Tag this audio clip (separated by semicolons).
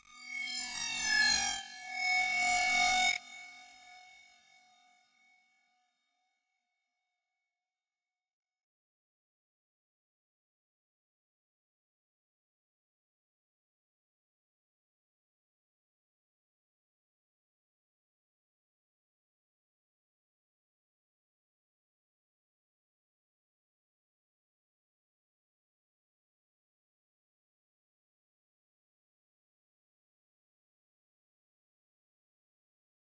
experimental; harmonica; BMP